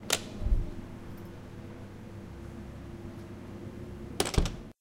This sound is recorded with a H2 zoom and you could percieve the sound of openning and closing a WC door.
You can perceive a closed space with resonances from some machine and where someone open a wc door and after a fews seconds door is closed.
campus-upf, closing, door, openning, UPF-CS12